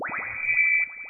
A sound which was supposed to be an item respawn to replace the original item respawn sound in Half-Life 1. Sound made with Audacity and GoldWave, completely artificially generated.
alien, extraterrestrial, item-respawn, item-spawn, mysterious, squeek, squeeky, strange